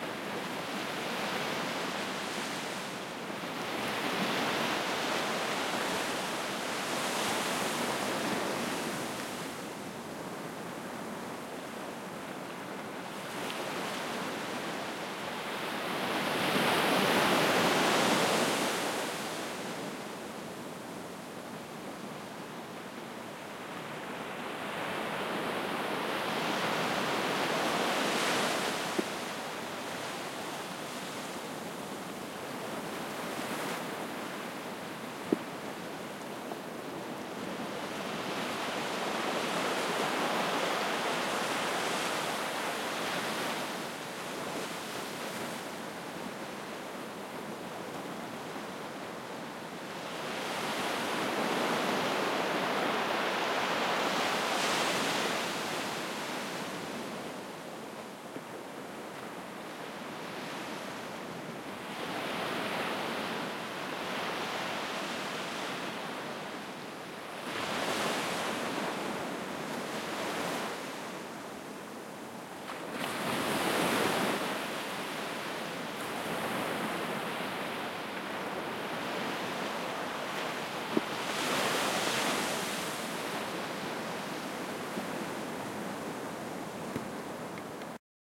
mar orilla
seashore
h4n X/Y